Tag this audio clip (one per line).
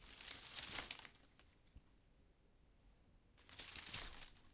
crunch
fall
rustling
tree
wind